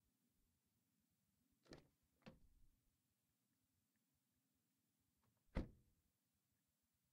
Car Open Close
Car door opening and shutting in distance.